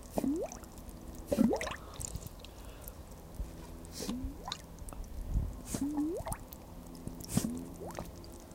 stereo wave recording of different bubbles, made a few years ago for a theatre music
kitchen, bubble, water